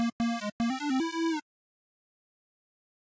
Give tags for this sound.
cartoon
8-bit
levelup
chiptune
beep
nintendo
videogame
video-game
8bit
level
arcade
retro

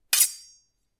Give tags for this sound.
blade
friction
metal
metallic
slide

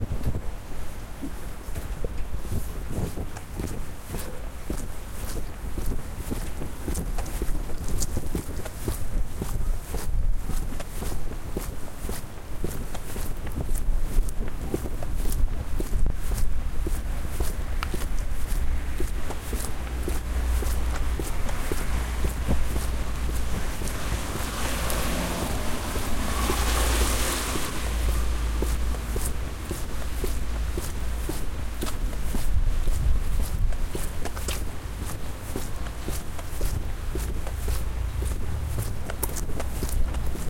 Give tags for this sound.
feet
foot
footstep
footsteps
sidewalk
step
steps
street
walk
walking

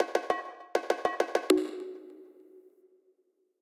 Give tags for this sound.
Bongos
Drums
Music-Based-on-Final-Fantasy
Percussion
Sample

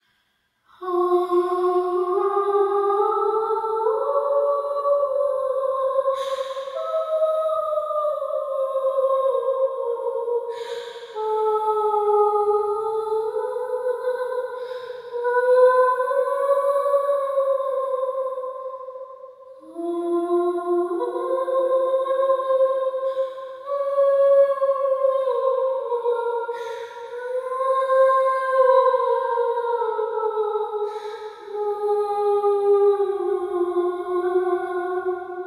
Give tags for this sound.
ahh
ghostly
girl
lost
lovely
pretty
sad
singing
woman

